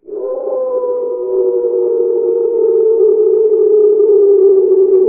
dinosaur sound
a lowered sound of a hawk
fantesy, monster, creature, jurassic